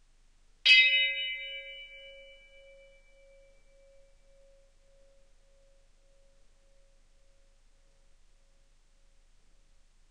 This version is 50% slower than the original. Edited in Audacity 1.3.5 beta